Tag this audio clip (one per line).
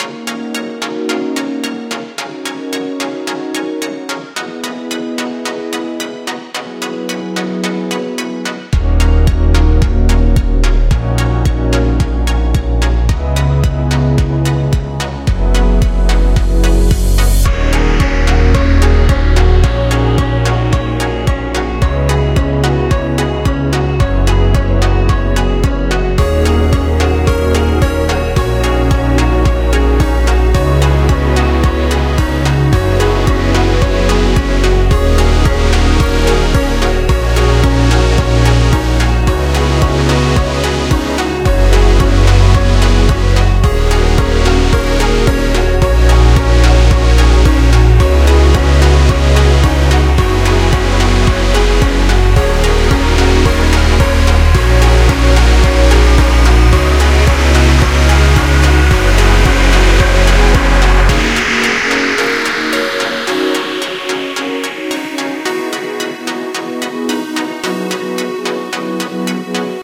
Dance
EDM
Music